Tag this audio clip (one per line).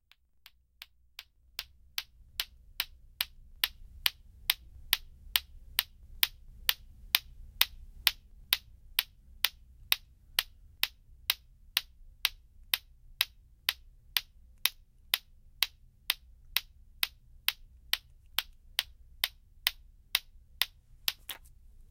batebate
microphone
velho
engra
old
funny
batebola
brinquedo
humour
toy
mic
batebag
antigo
ado
laughter